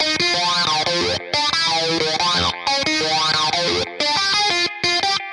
90 Atomik Guitar 06
fresh grungy guitar-good for lofi hiphop
atomic, hiphop, free, sound, electro, grungy, series, guitar, loop